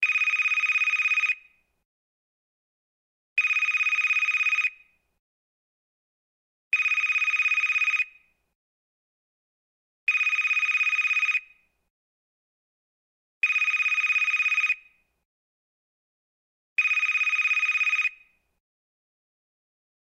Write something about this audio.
This is the ring of one of those cheap cordless telephones you buy right out of college to show off you new found status. Nothing says playboy like a blue see-through cordless telephone.